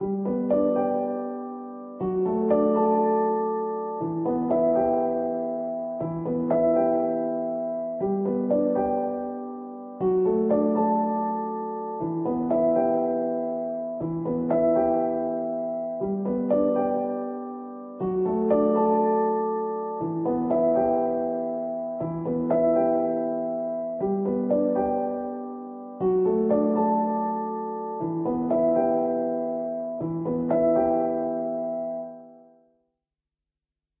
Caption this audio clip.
Piano loops 034 octave short loop 120 bpm
120, 120bpm, bpm, free, loop, Piano, reverb, samples, simple, simplesamples